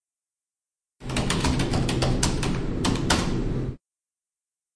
typing on keyboard
Sound of someone typing fast on a keyboard.
keyboard, type, typing